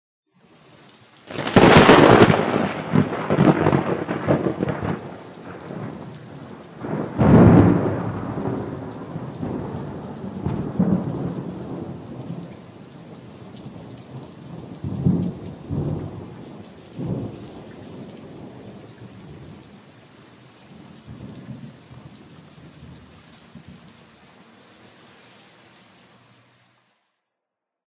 thunder rain
Clip with sounds of rain and nice thunder from East Siberia. Oktava 102 used.
thunder, rain